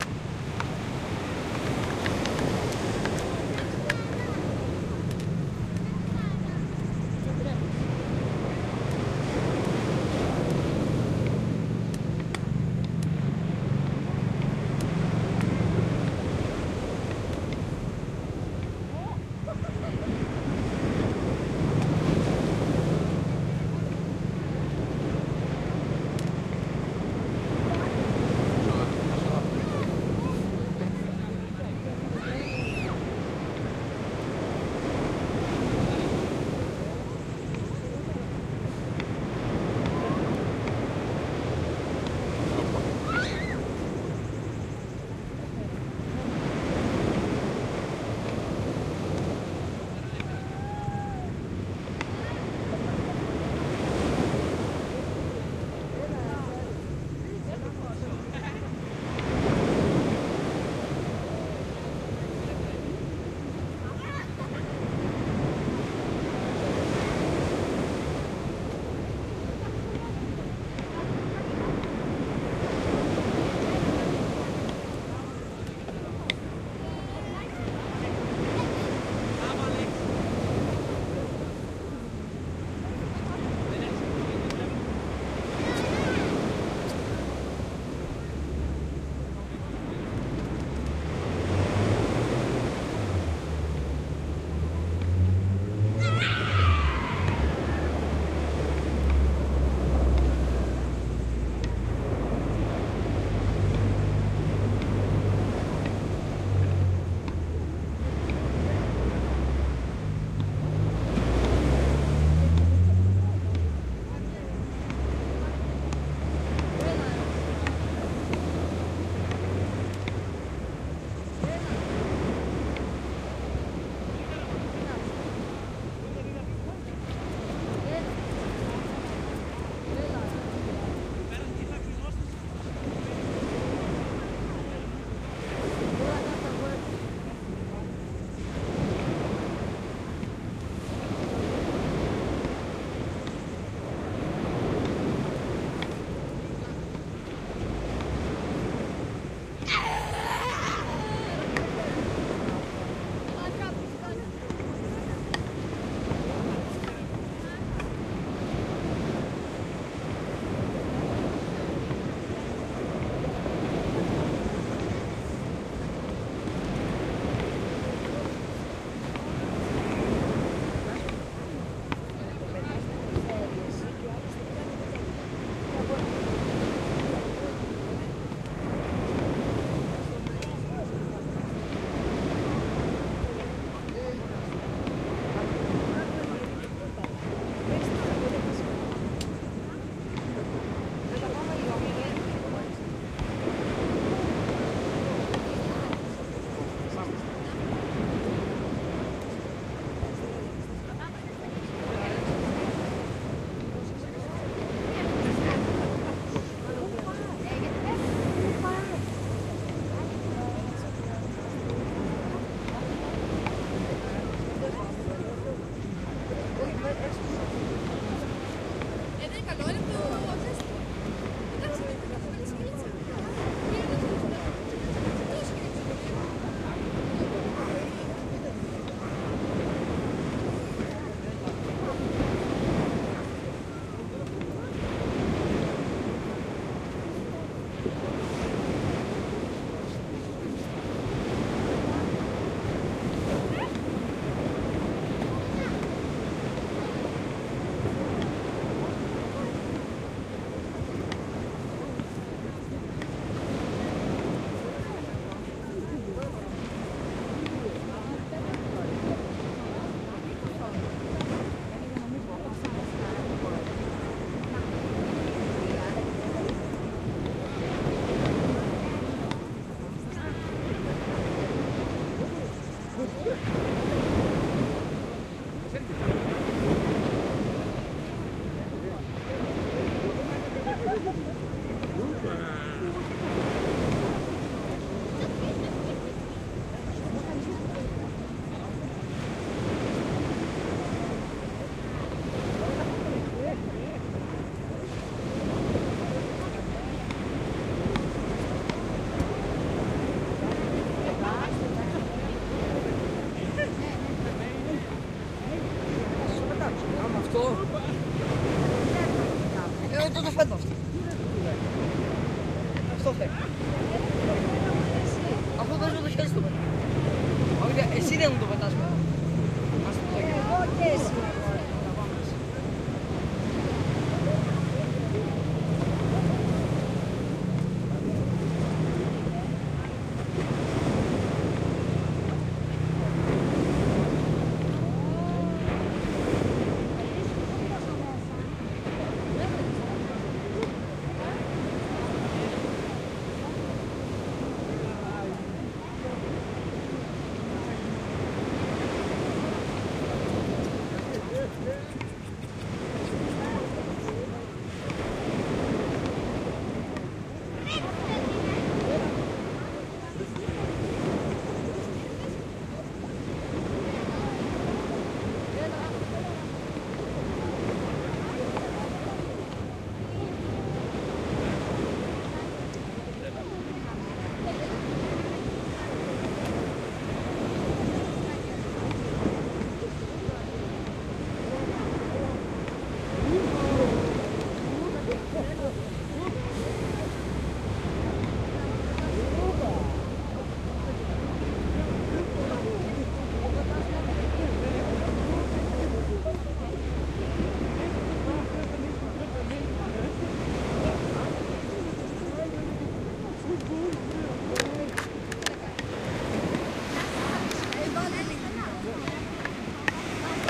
Ionion- Sea sounds
Seasound recording near the shores of Northwestern Greece.
by, Greece, Northwestern, people, sea, seawaves, shore, sounds, water